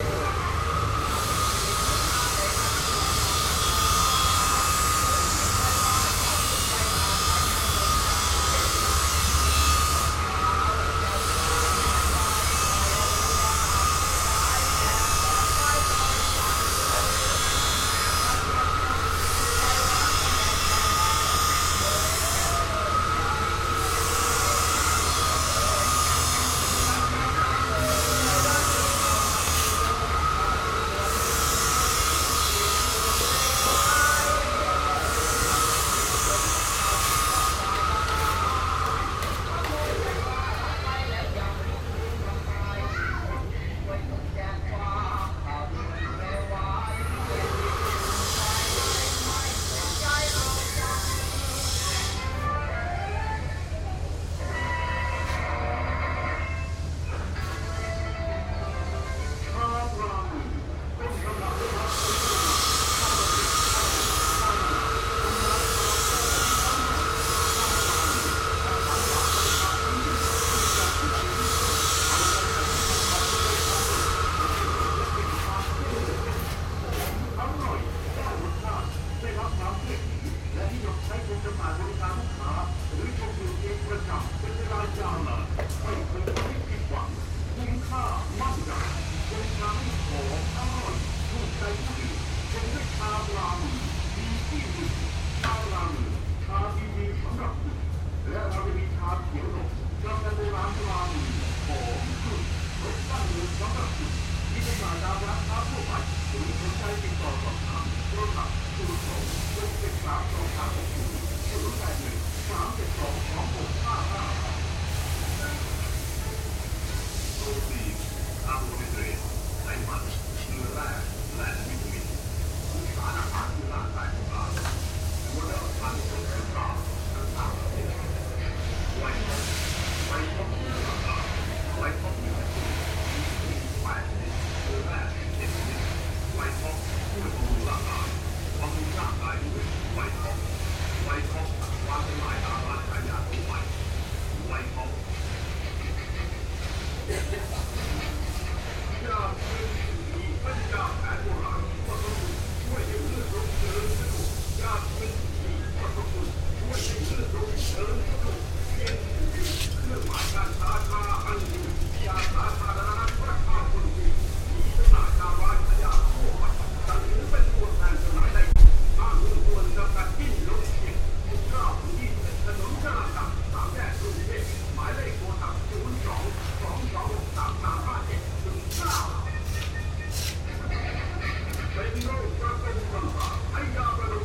A home construction site in Bangkok, Thailand. An FM radio is also playing some advertisement.Recorded with Rode NT-1000 microphone with Audio Kontrol 1 audio interface.